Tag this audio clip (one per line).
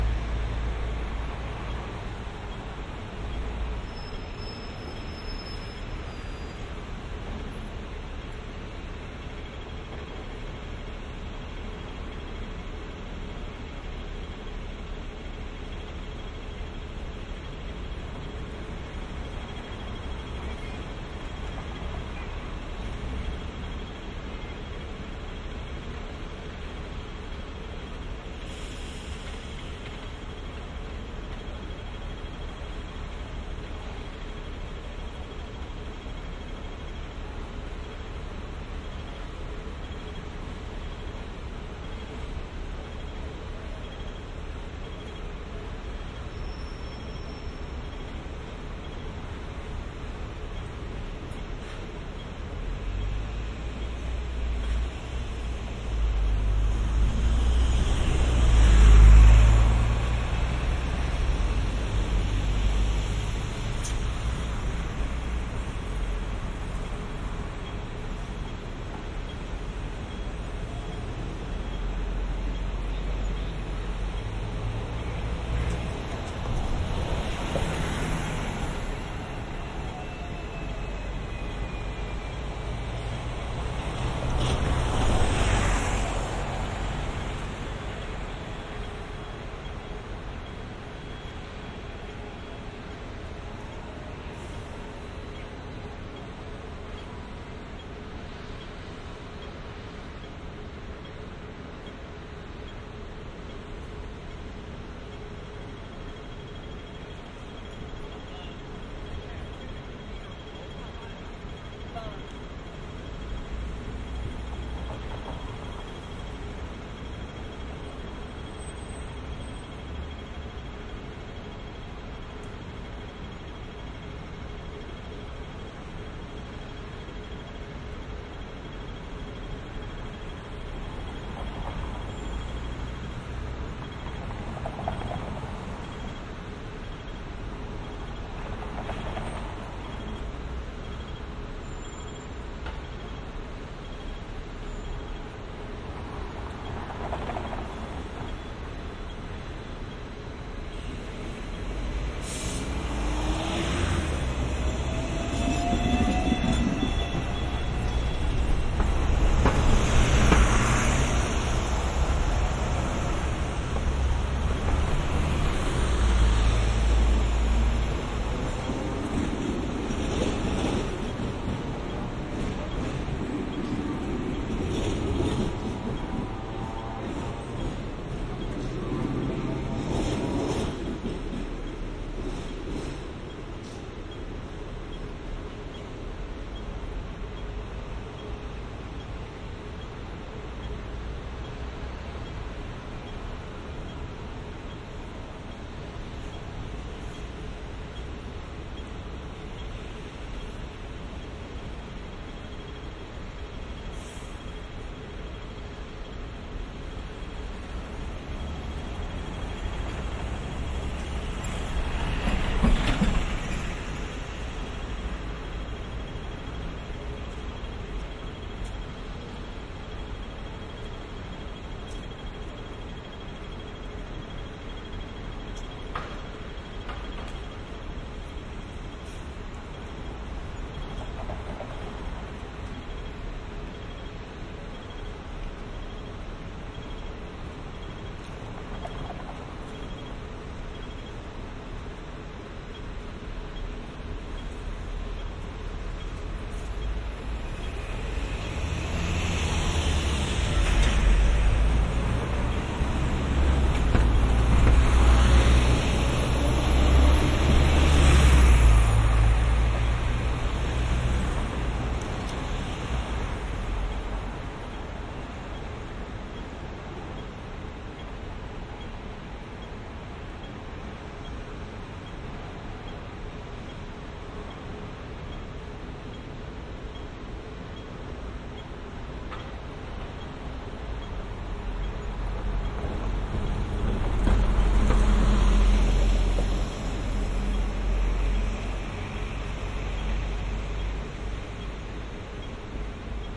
Binaural
Central
field-recording
Hong-Kong
traffic